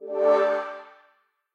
Flourish Spacey 1
kind of a reveal type space sound with a rounded sound
fi, high, freq, feel, sci, click, button, quick